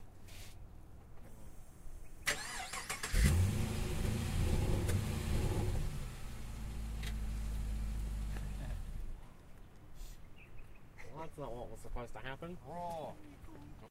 vehicle holdenssv ute ignition failed
holden ssv ute engine ignition, idle then turn off.